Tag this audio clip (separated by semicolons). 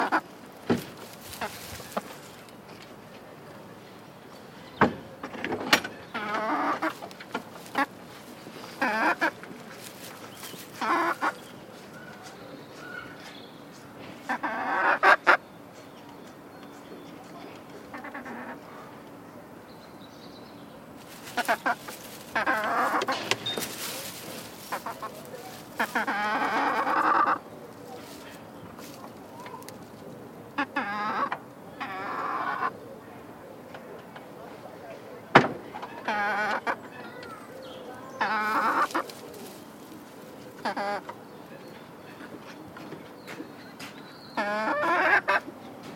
Chicken; buck; farm; animal